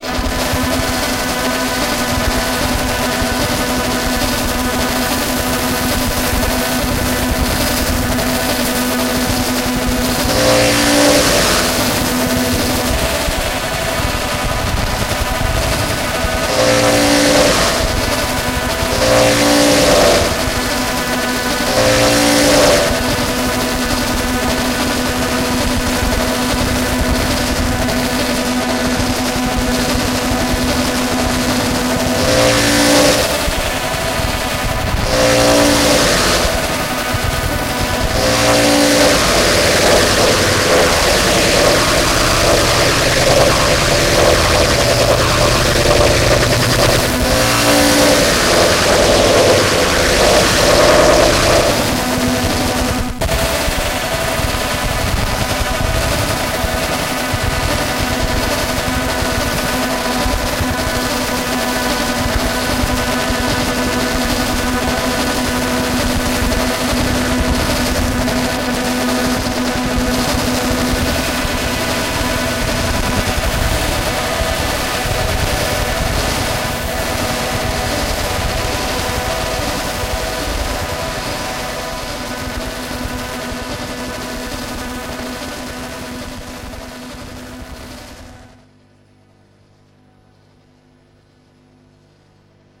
The sounds in this clip from Boise are hard to make a good record on. Our friend in Boise guess that this is about a spaceship that communicates with a beacon millions kilometers from our planet. The purpose may be navigation coordinates to find our little planet?
fantasy, sound, beacon, Space, communication, spaceship, aliens, design